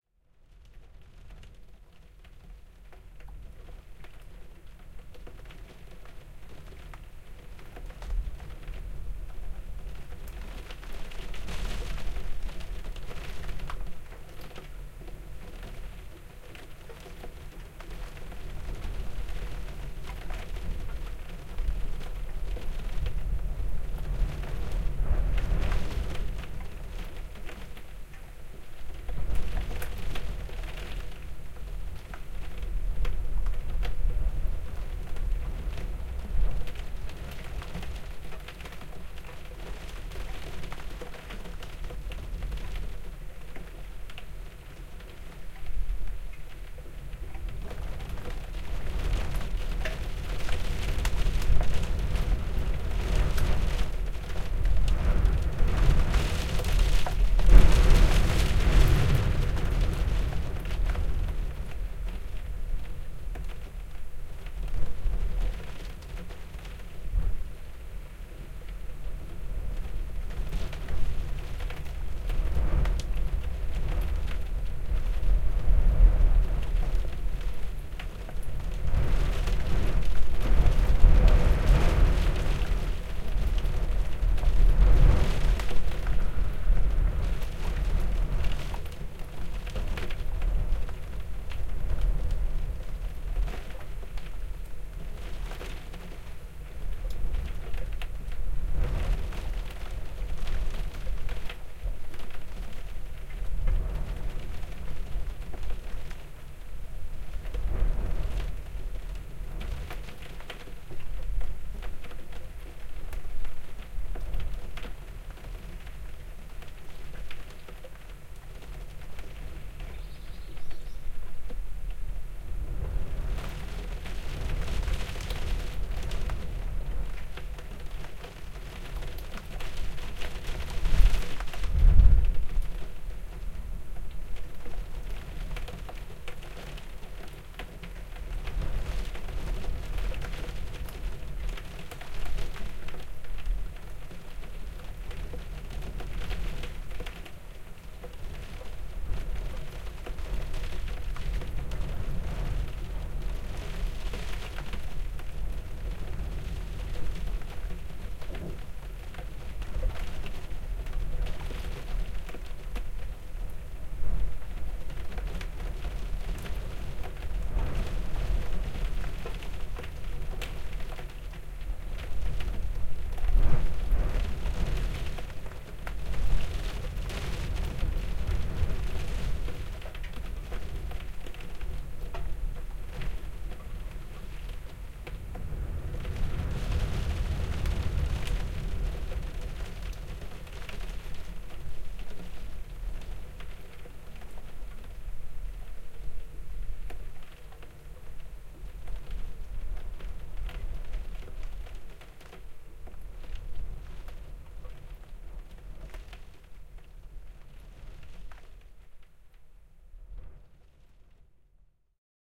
An evening indoors listening to the stormy weather battering the North East coast.
indoors, weather, windy, stormy, blowing